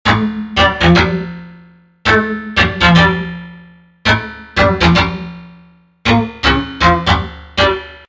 Night String
A melodic type sound recorded at 97bpm.
string, synth